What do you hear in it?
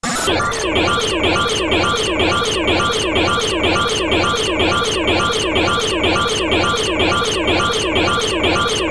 raw epdf
Raw data of a DOS executable file. The result is this strange and curious sound with varied bleeps in a semi-loop.